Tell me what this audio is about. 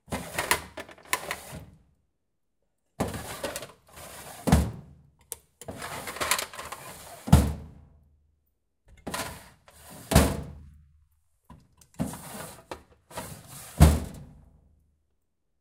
The sound of an old metal filing cabinet having its draws opened and closed several times. Recorded with a Tascam DR-40